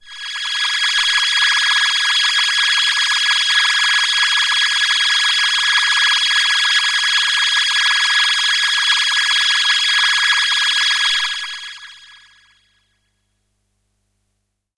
Space Pad - G#5
This is a sample from my Q Rack hardware synth. It is part of the "Q multi 012: Spacepad" sample pack. The sound is on the key in the name of the file. A space pad suitable for outer space work or other ambient locations.
electronic, space, waldorf, synth, space-pad, ambient, multi-sample, pad